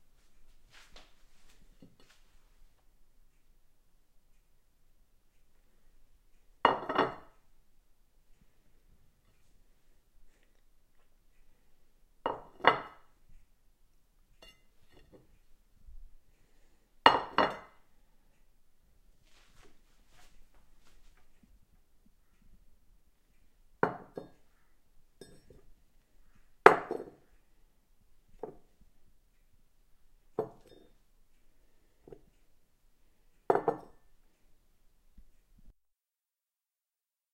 Plate and Mug on Table
The sound of first a plate and then a mug being placed on and removed from a dining room table.
Recorded on my Blue Yeti.
clatter, table, food, kitchen, dining-table, plate, clunk, drink, tableware, mug